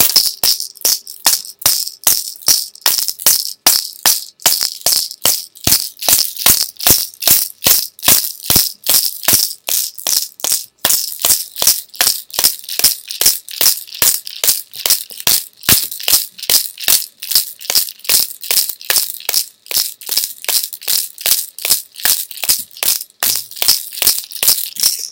Battery hitting Vitamin bottle with few vitamins left
MTC500-M002-s14, sounds